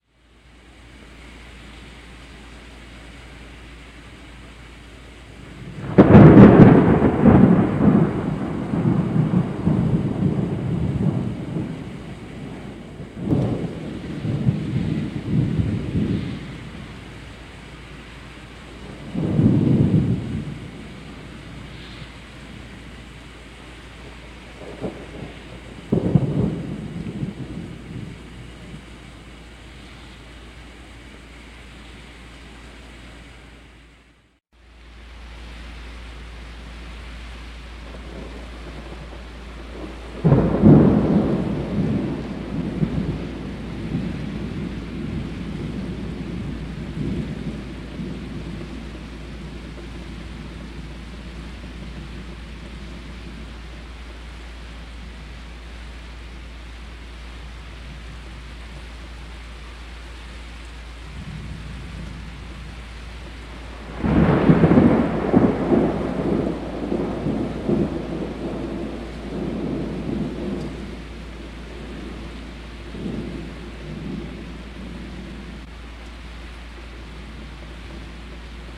Thunderclaps from a violent thunderstorm.
1:18 - Recorded Spring of 1989 - Danbury CT - EV635 to Tascam Portastudio.